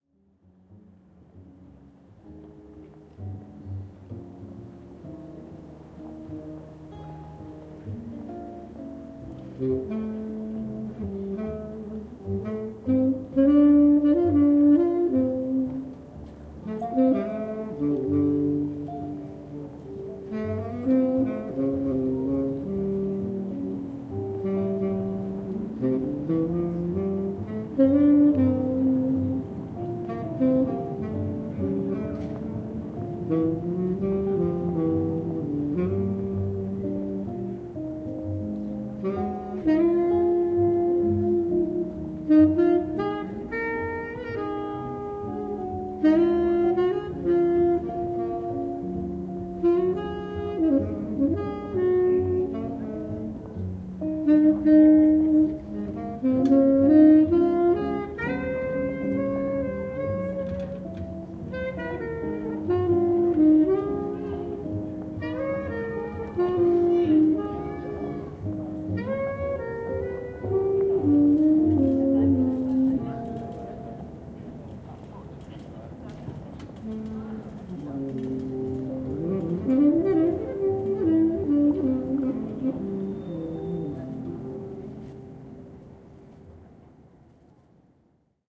jazz street musicians
Three musicians playing a jazz tune on a cafe terrace in the streets of Cologne. Sony ECM-MS907, Marantz PMD671.
guitar, street-music, cafe, saxophone, jazz, bass